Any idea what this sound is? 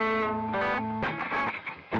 Randomly played, spliced and quantized guitar track.
120bpm, buzz, distortion, gtr, guitar, loop, overdrive